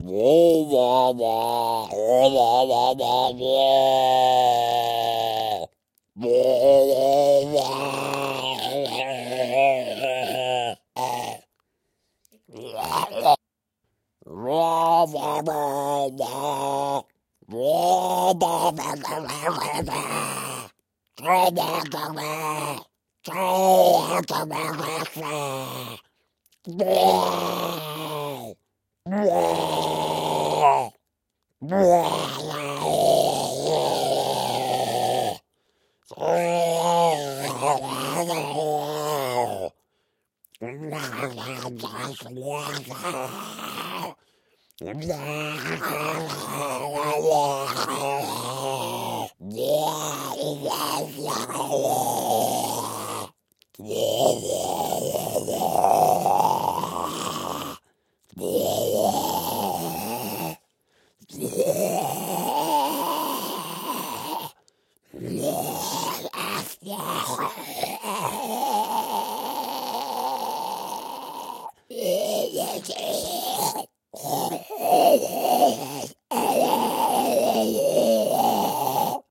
01 - weird/zombie voices original
A sample of me playing with my throat, weird talkings and zombie-like grunts.
Recorded with a PCM-D100.
recording, voice, zombie